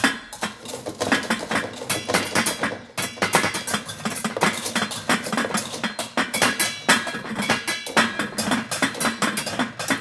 Pots & pans
Recording of some pans and pots being hit. Recorded with a Zoomh1 and edited (eq, compression, delay) in Logic Pro.
pots, pans, field-recording, percs, percussion, steel